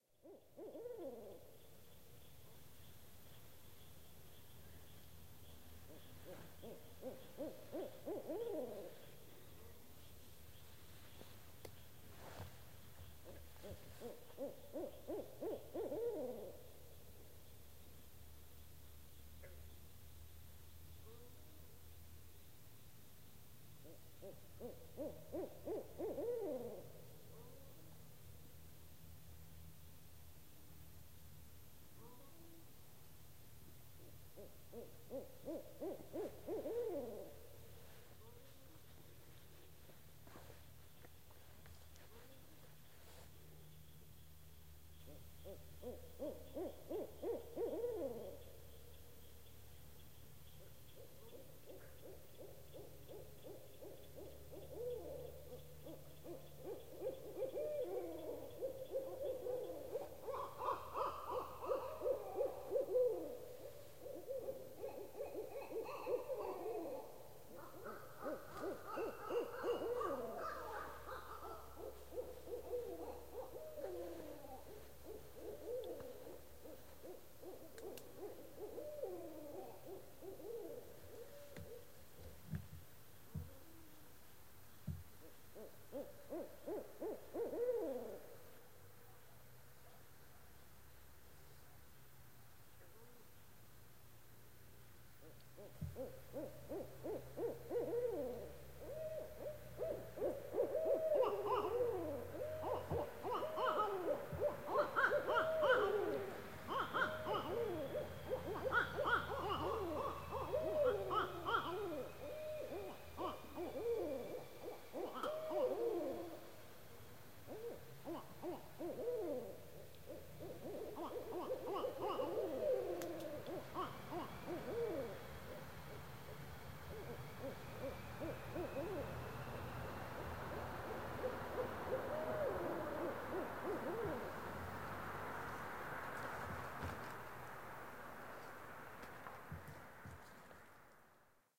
These owls were recorded around 8:30 at night in the Shawnee National Forest using my trusty Rode NTG-2 on a mic stand. Even though it was a mild night and the insects and frogs should have been vocalizing, they weren't. It was kind of neat -- a dead-quiet night with nothing but these owls. If you thought that owls only do "hoot-hoot" you'll be surprised. After about a minute into it they really get going.